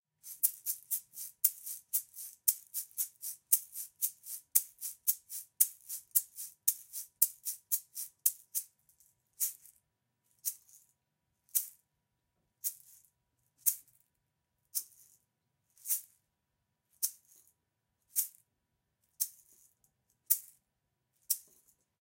Salsa Eggs - Black Egg (raw)
These are unedited multihit rhythm eggs, and unfortunately the recording is a tad noisy.